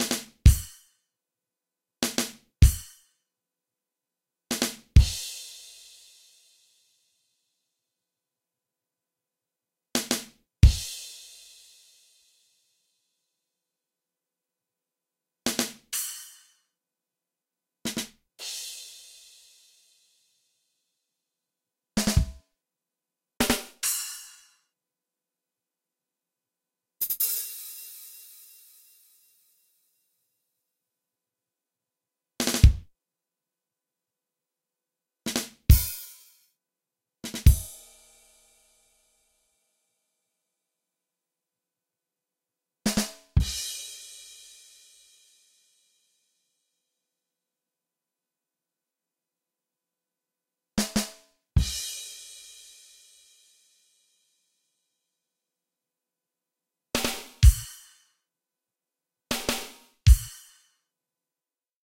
Baddum Tish - Comedy Rimshots - classic rim shot
For all your comedy backline needs, I present a collection of "rimshots" or accents, also known as "baddum tish." I have included some variations in kits, sounds, tempo and styles.
accent, ADPP, baddum, badum, beat, classic, comedian, comedy, crash, cymbal, drum, funny, gag, hiyoooo, jokes, punchline, rim, rimshot, shot, snare, splash, tish, trolling